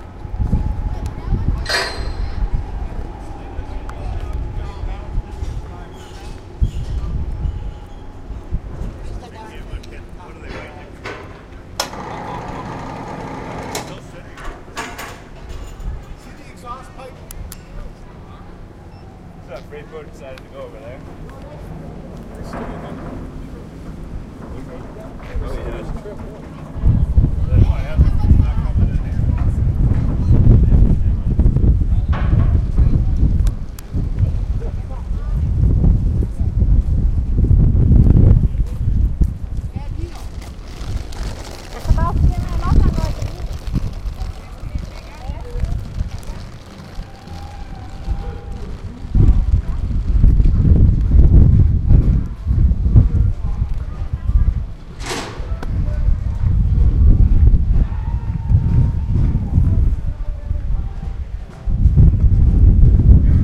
Woods Hole Ferry Dock, Sept 5 2010
Workers at the ferry dock, Woods Hole Steamship Authority, Massachusetts. Recorded using a Zoom H2.
dock, exhaust-pipe, Ferry, field-recording, ramp, steamship, winch, Woods-Hole